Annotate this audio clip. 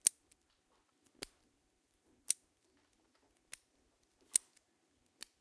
essen mysounds liam
a little locker opened and close, key turned
Essen, germany, mysound, object